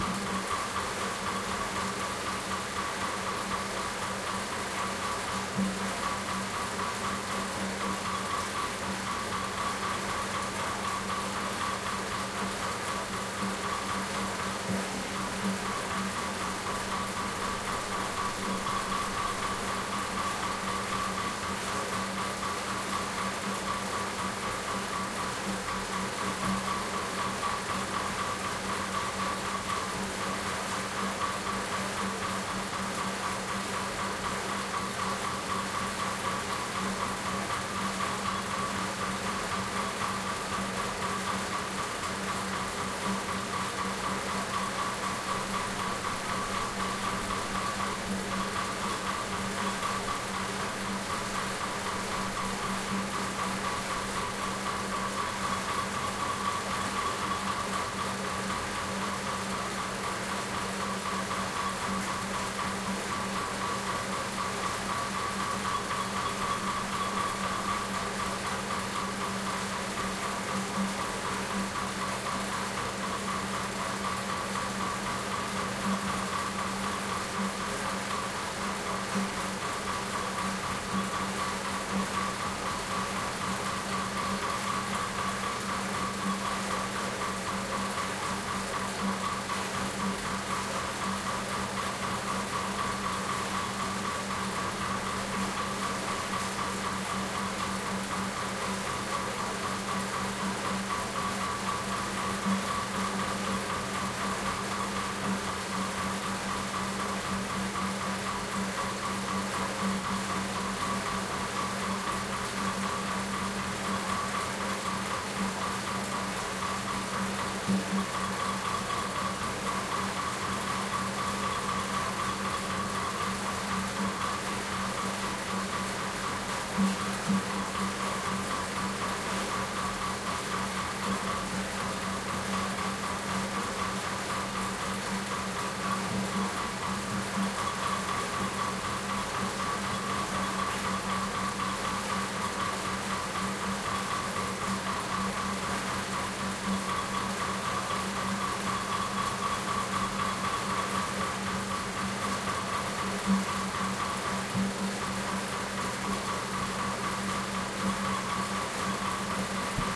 Ambi at the inside of a modern windmill with elevator going up and down.